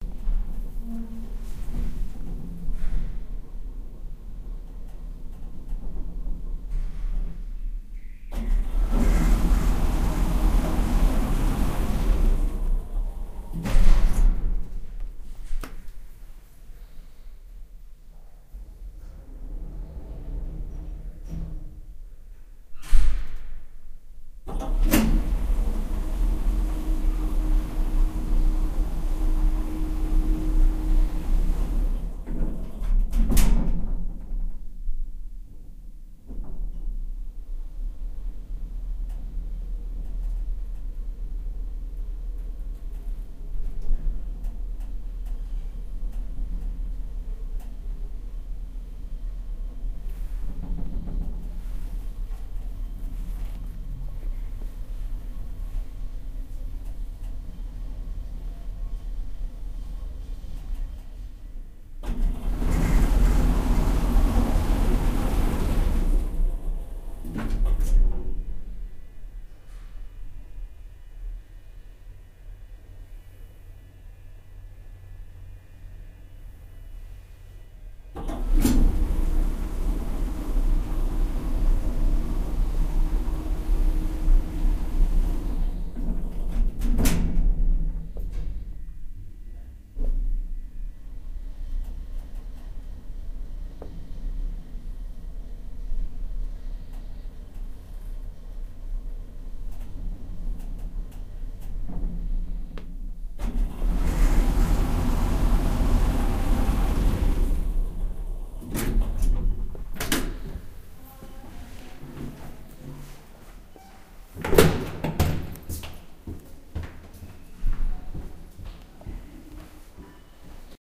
Recorded at Staatstheater Kassel (Germany) with Edirol digital recorder.